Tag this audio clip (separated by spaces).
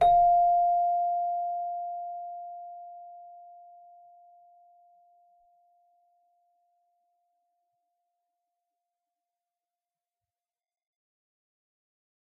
bell celesta chimes keyboard